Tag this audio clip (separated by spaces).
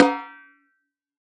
1-shot drum multisample velocity